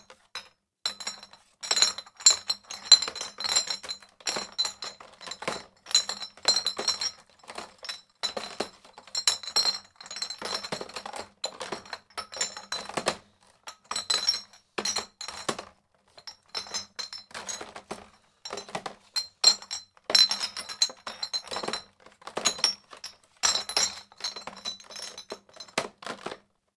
Beer bottles in a crate being moved
glass, bottle, crate, beer